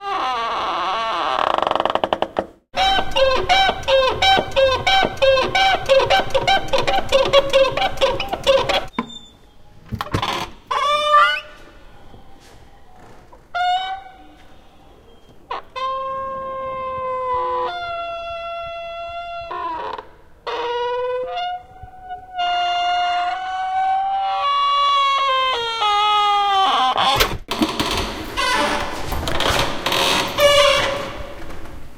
Door Squeaks
Making squeaky sounds with a door.
Recorded with Zoom H2. Edited with Audacity.
iron, gate, squeak, rusty, spring, slow, door, creepy, metal, entrance, bed, oiled